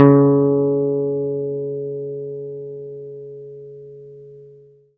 C S P-Bass pick C#3
One in a collection of notes from my old Fender P-Bass. These are played with a pick, the strings are old, the bass is all funny and there is some buzzing and whatever else including the fact that I tried to re-wire it and while it works somehow the volume and tone knobs don't. Anyway this is a crappy Fender P-Bass of unknown origins through an equally crappy MP105 pre-amp directly into an Apogee Duet. Recorded and edited with Reason. The filename will tell you what note each one is.
pick, multi-sample, bass, fender, old, notes, precision